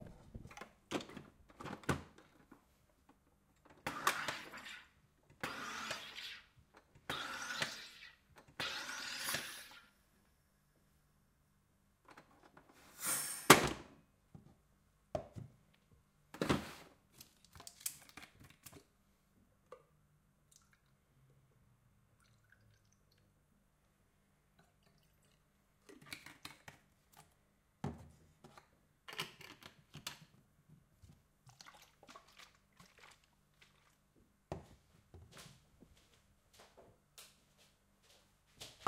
Making cola with a soda stream. Sounds of gas and electric switches, could easily be mixed/filtered as industrial sounds
electric-switch, soda-stream